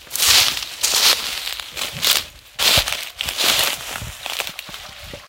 Crunching sound of walking through leaves. If you like my sounds - check my music on streaming services (search for Tomasz Kucza).
crunch, forest, leaves, steps, walk, walking